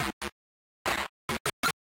Glitch Kill
A few sample cuts from my song The Man (totally processed)
breakcore, glitch